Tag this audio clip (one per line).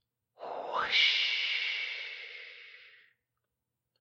whoosh
sound-effect
soft
voice